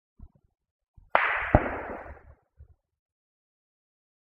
A far off shot from a hunting weapon of unknown type.